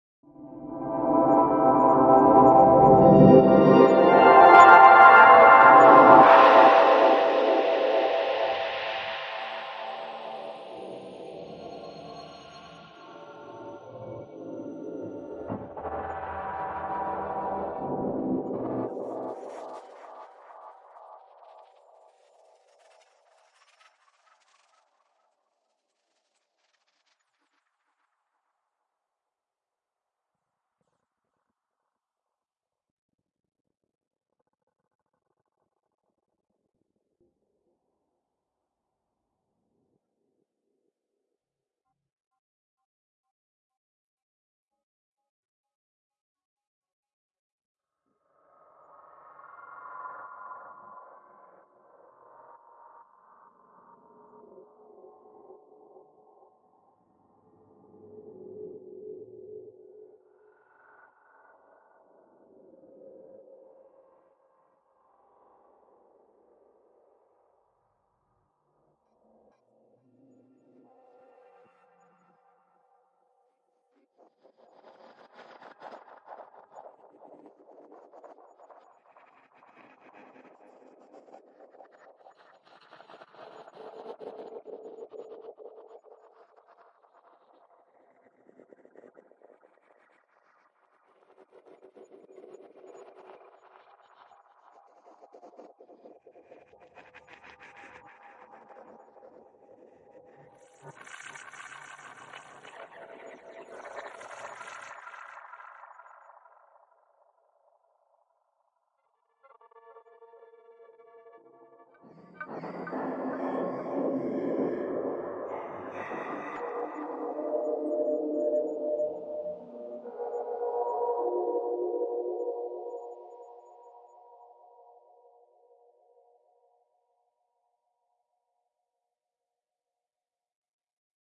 Drone-e tale 14
Reaktor - Dron-e (ambient, generative, abstract and cinematic soundscapes and drones)
Recording: 130bpm
Date: 10 July 2017